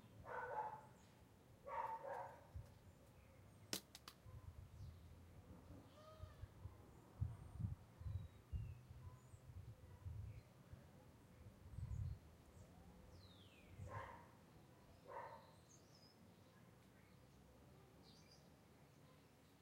dogs and birds 02 close steps
Ambient sounds recorded in a village in portugal, August 2016 using a Zoom H1 recorder fitted with standard windshield.
Low cut filter in Audacity to remove windnoise below 100Hz.
Plenty of birds and also distant dogs barking.